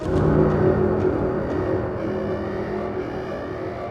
Creepy Loop SoundSmith
Hidden creatures making abominable noises in the shadows....
all original sounds looped for some fun!